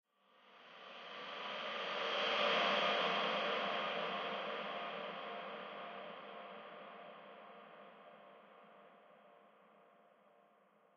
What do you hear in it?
Smelly demon breath sweep
atmosphere, breath, creepy, dark, demon, hell, horror, sc-fi, sweep